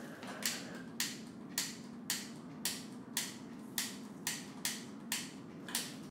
FX - mechero electrico